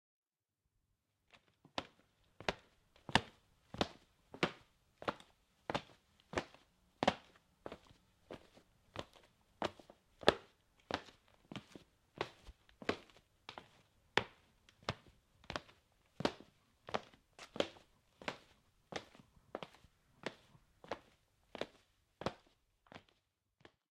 07-Man walking wooden floor
Man walking on wooden floor